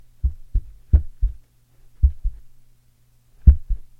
bending a paper cover (sketchbook)